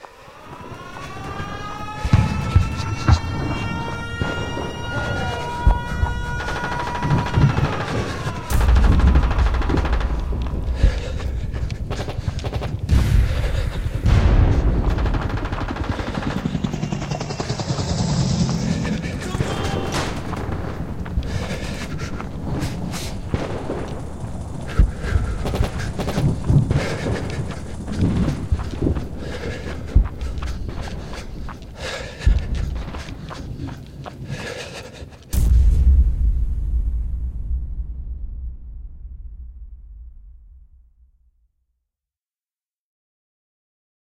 sound of a soldier fleeing the battlefield.
198849__bone666138__war-ensemble
201671__frankie01234__mp5-submachine-gun-down-the-street
96973__kizilsungur__military_alarm
424317__miksmusic__explosion-in-the-air
347593__nicjonesaudio_battle-rifle
391725__morganpurkis__battle-rifle
56900__syna-max__war
387140__frankum__sounds-of-war-01
151555__holymatt123__war-sounds
515818__arnaud-coutancier__thunder-clap-rain
162626__richardemoore__cw_cannon_single1
161967__qubodup__to-war-assault-drill-sergeant-shout
397292__theuncertainman__forward
456037__florianreichelt__heavy-breathing-while-running
265655__ylearkisto__sota-war